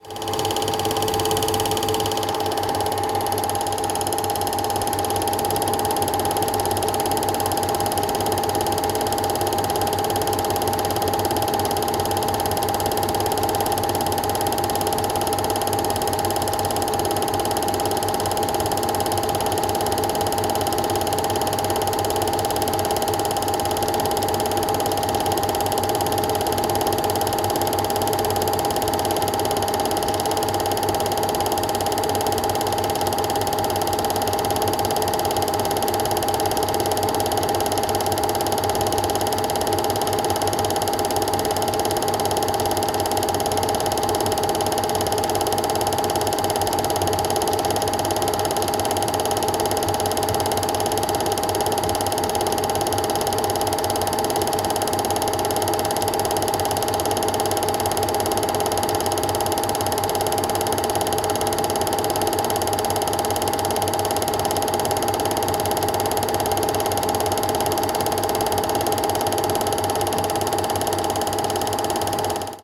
Standard 8 film being run through a cine projector.
old-style-projector, film-projector, cine-projector